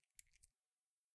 S Handful Pills
moving a hand full of pills
tablets, pills, handful, shake, hand